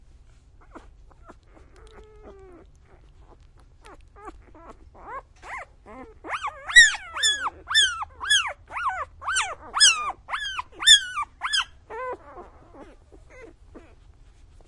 While my first set was a literal translation of the contest theme; my second set of samples for the competition were recorded in a context relating to organic/life. This is a sample of a litter of seven puppies. It begins with them grunting the then they begin to loudly squeal.